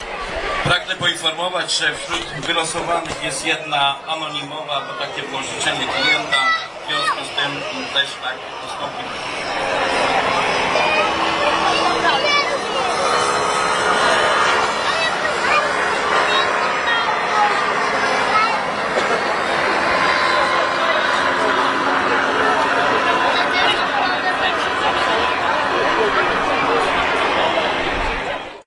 27.06.2010: the Day of Strzalkowo village (village in Wielkopolska region in Poland). I was there because I conduct the ethnographic-journalist research about cultural activity for Ministerstwo Kultury i Dziedzictwa Narodowego (Polish Ministry of Culture and National Heritage). the Day of Strzalkowo is an annual fair but this year it was connected with two anniversaries (anniv. of local collective bank and local self-government).
the paraglider show sound.